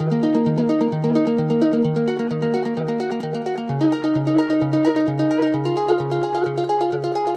130BPM
Ebm
16 beats